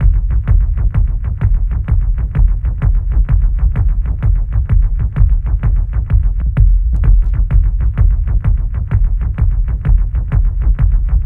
Experimental Kick Loops (23)
A collection of low end bass kick loops perfect for techno,experimental and rhythmic electronic music. Loop audio files.
120BPM, 2BARS, 4, BARS, bass, beat, dance, design, drum, drum-loop, end, groove, groovy, kick, loop, Low, percs, percussion-loop, rhythm, rhythmic, sound, Techno